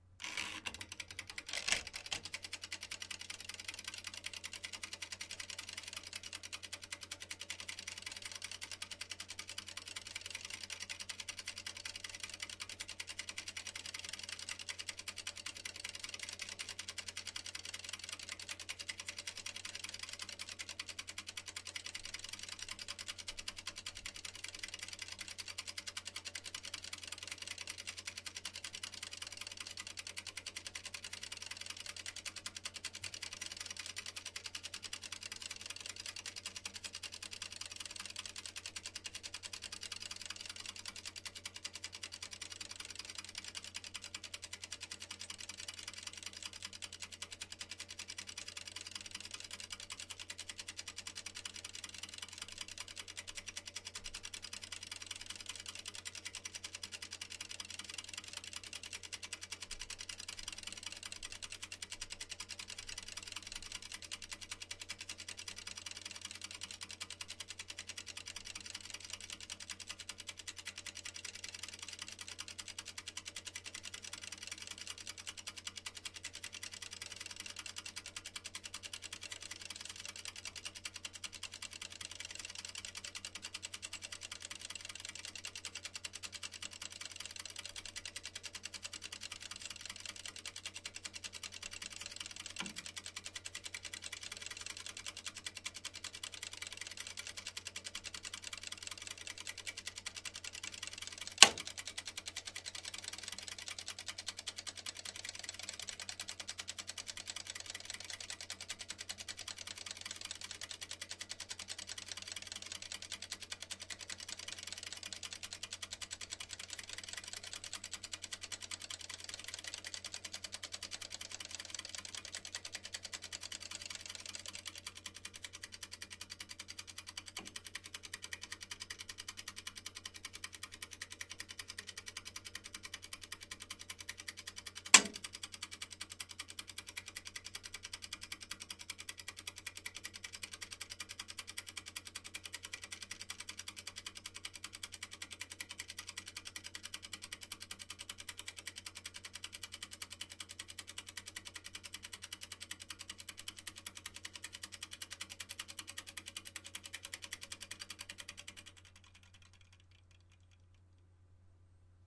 washingMachineCoupleTimersLong mono
Old soviet washing machine "Сибирь-2" ("Siberia-2") two timers. Start first timer, start second timer, ticks, stop second timer, stop first timer. Loud clicks it is timer tripped. Long version.